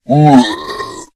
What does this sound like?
A powerful low pitched voice sound effect useful for large creatures, such as orcs, to make your game a more immersive experience. The sound is great for attacking, idling, dying, screaming brutes, who are standing in your way of justice.
arcade, brute, deep, fantasy, game, gamedev, gamedeveloping, games, gaming, indiedev, indiegamedev, low-pitch, male, monster, Orc, RPG, sfx, Speak, Talk, troll, videogame, videogames, vocal, voice, Voices